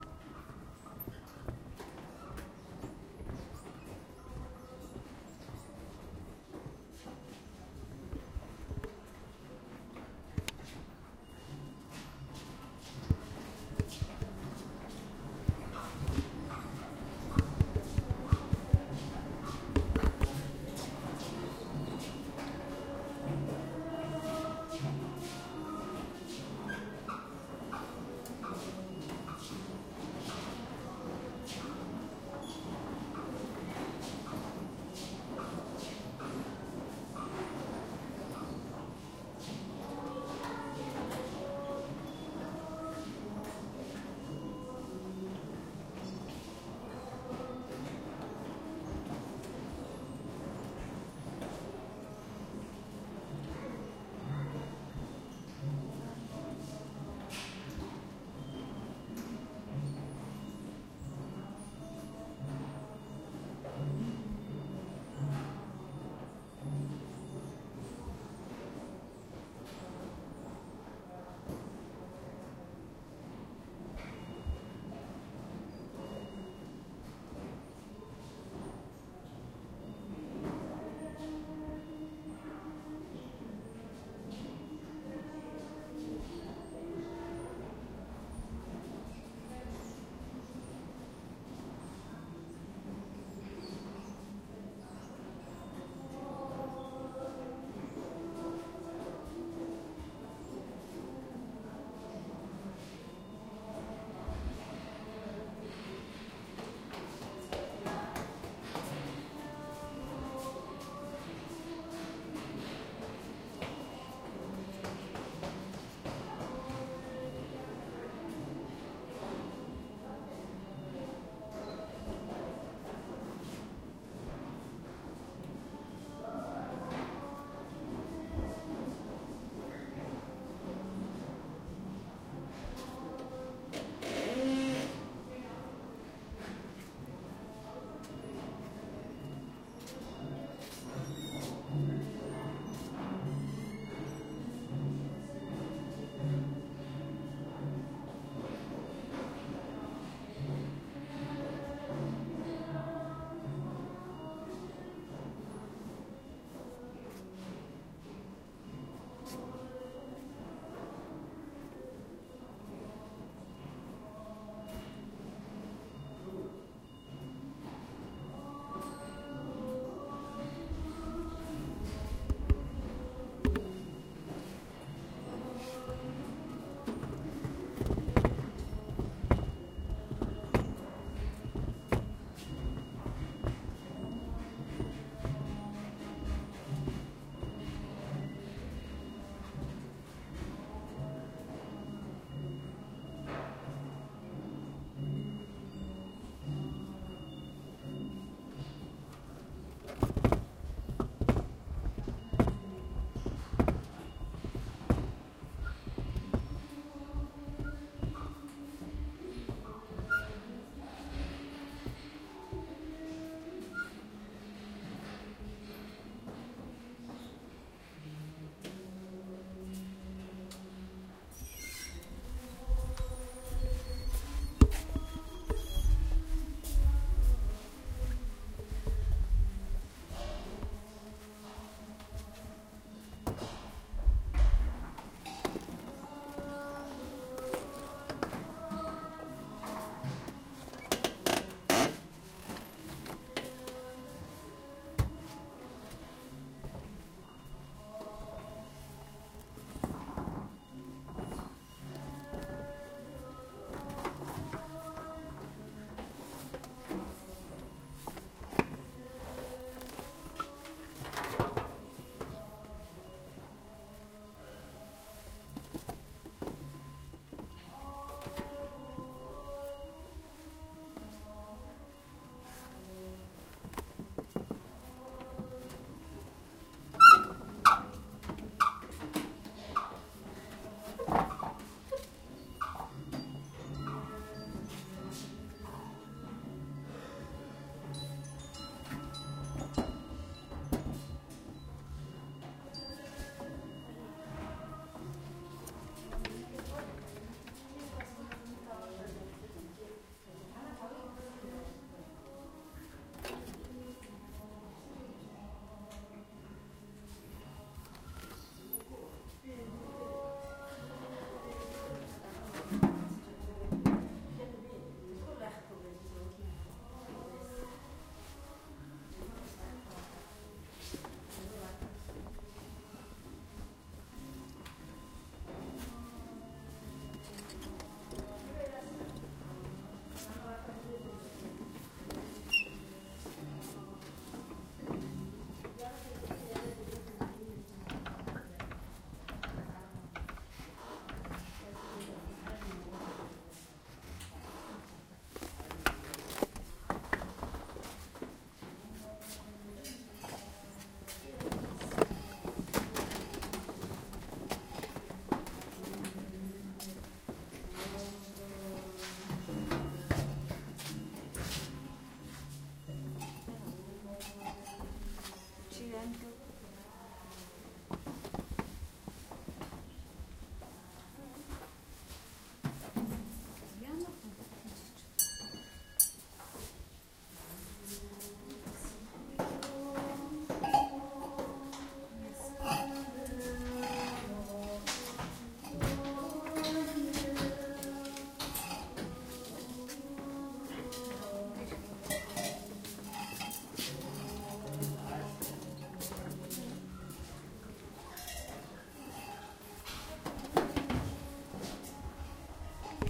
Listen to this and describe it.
Tibetan Buddhist temple spinning prayer wheels singing Ulan Bator
I walk around a Tibetan Buddhist temple in Gandan Monastery, Ulaanbaatar spinning the prayer wheels. Singing starts and gets louder